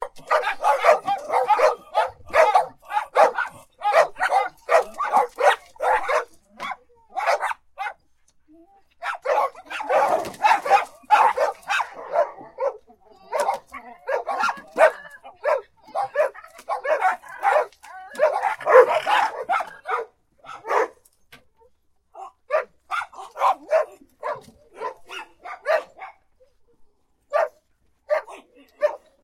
Barking Dogs
This is a recording of a group of around 15 dogs all barking as they come out to play.
If needed I can provide any recordings of dog sounds on request.
angry, animal, Astbury, bark, barking, barking-dogs, dog, dog-bark, doggie-daycare, dogs, Ferrell-dogs, growl, growling, guard-dog, guarding-dog, howl, Hund, Hunde, pet, pets, puppy